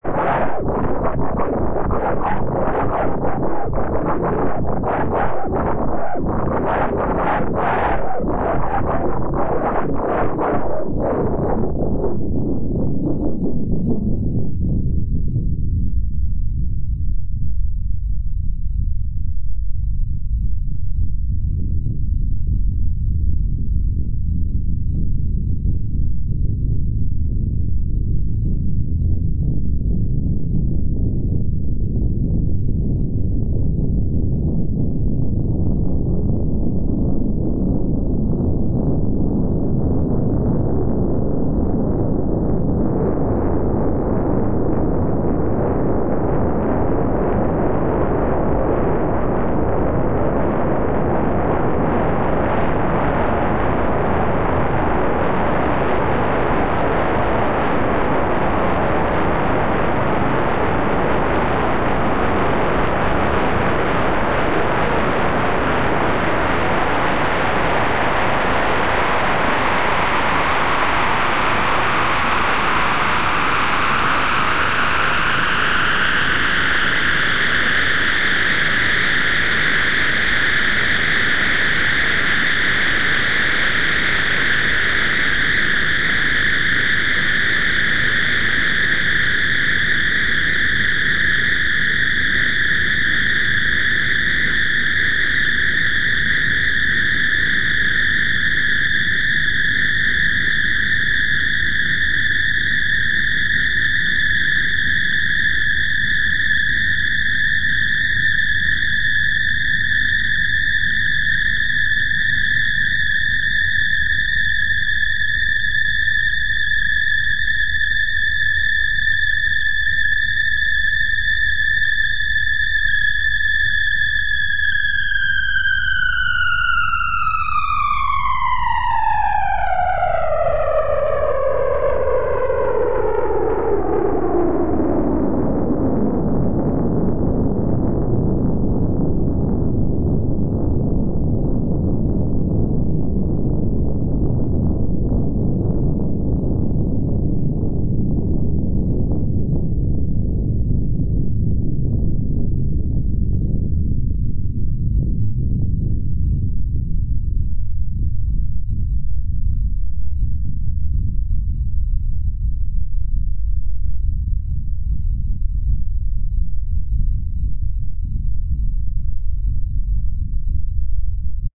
Demo of a range of various sounds from cross-modulating two sine waves.
This is a demonstration of a noise production technique -- this sound is not likely to be particularly useful on its own, though you may find certain sections to be good source material for further processing toward some goal. This is noise produced by cross-modulating two sine wave oscillators, with both frequency and amplitude modulation used, plus a variable delay in the frequency modulation that is varied by a low-pass filtered product of the outputs. This is as opposed to using a white noise (random) source and then modifying its output (especially with filters) -- here, no random noise source is employed. The two oscillators feed the left and right channel, but a certain amount of a composite signal is mixed into both to form a solidifying center -- that center signal is taken from the product of the two and simply filtered with a 9-sample moving average.